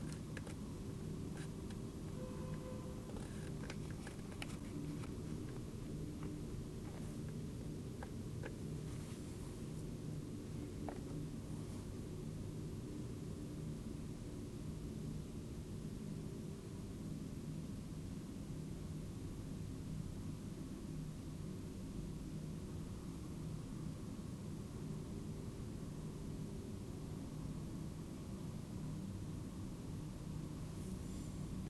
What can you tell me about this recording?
ambient with trafic a blowing wind
handworkingtrafficwind, some, noise, indoor